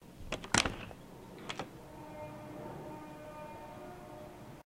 Opening a backdoor